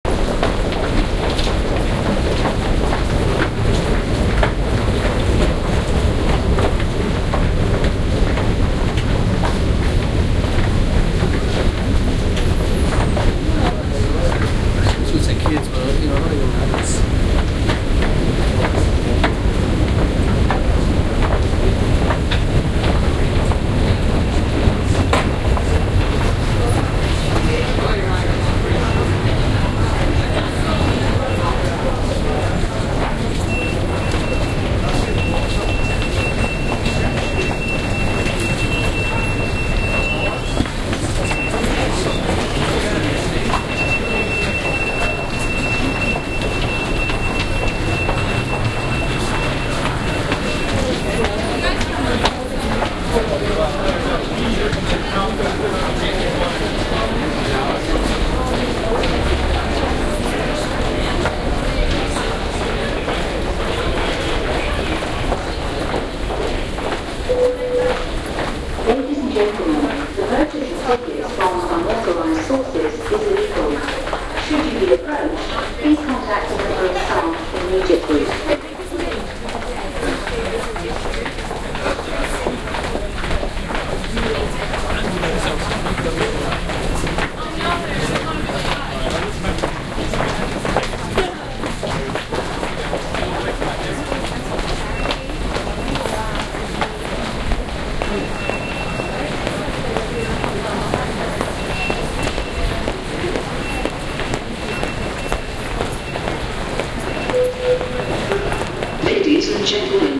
Kings Cross - Footsteps in Station
field-recording, city, atmosphere, general-noise, ambience, ambiance, london, ambient, soundscape, background-sound